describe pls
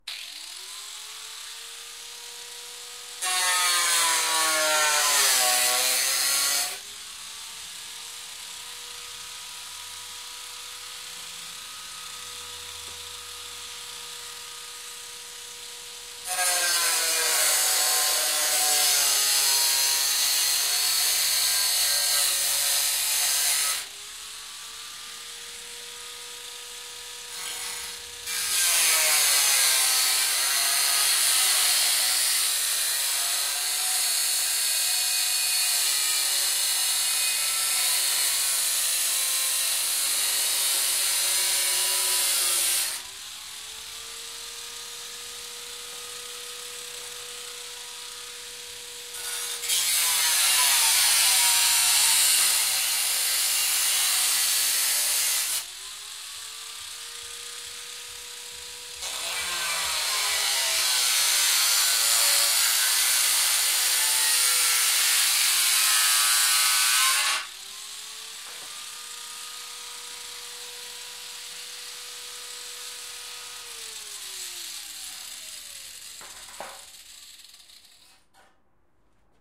metalworking.hand circular saw 4
Builder sawing metal with hand circular saw.
Recorded 2012-09-30.
construction, hand-circular-saw, saw